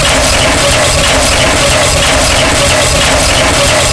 machSnd 002 Loud
a small loop of a "machine" sound.